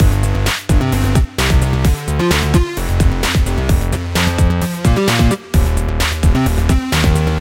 Juno Pulse Square
Bringing back the kick and claps with a modern-styled seamlessly looping track.
Can be used for almost anything that needs a bit more coolness.
Made using FL Studio
130-bpm, electric, drum-loop, electro, groovy, beat